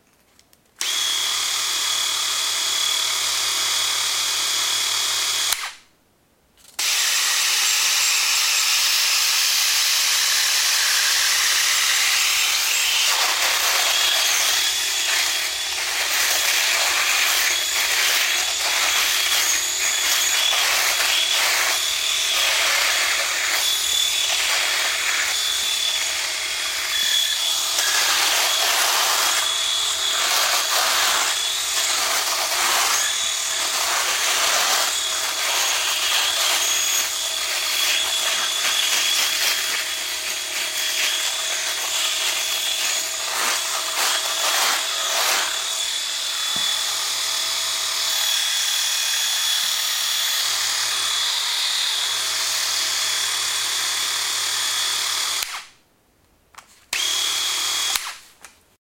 Shaver, Phillips Rotary, In hand and in use.